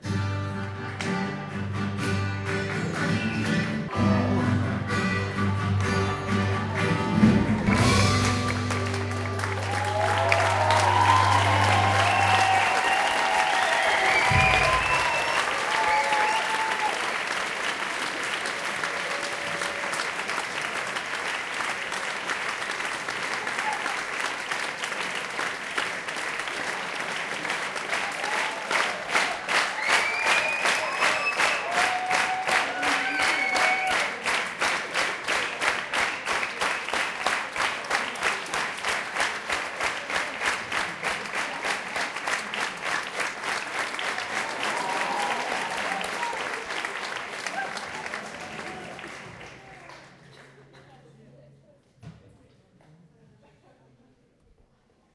end of song with applause2
End of song with applause and shouting. It seems that the band is leaving the stage and the applause is growing up. Recorded with Zoom H1 internal mic.